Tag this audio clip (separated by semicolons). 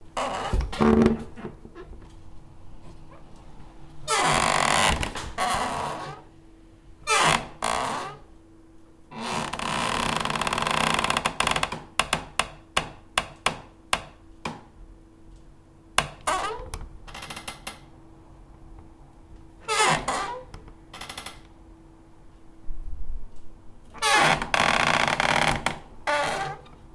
open; squeaky; closed; opening; door; closing; wooden; close; wood